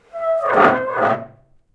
wooden.chair.03
that awful sound made when you dragg a wooden chair. RodeNT4>Felmicbooster>iRiver-H120(Rockbox)/el sonido horrible de una silla de madera cuando se arrastra por el suelo
household, chair, dragging, wood